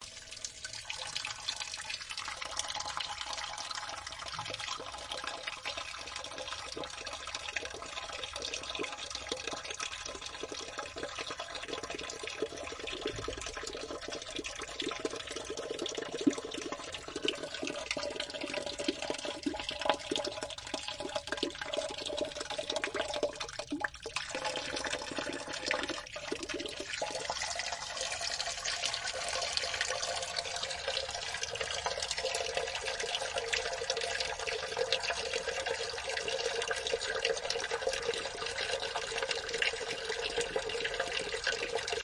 agua grifo
just a water flowing out the faucet
faucet, water, fill